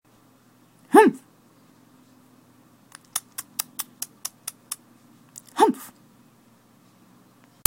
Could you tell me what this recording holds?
Me making disapproving sounds.